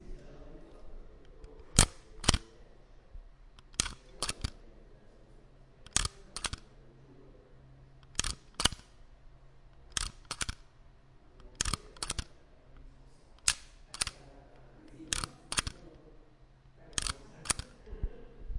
Stapler sound, could be nice for future garage. Recorded with Zoom H1.
garage, drums, stapler, percussion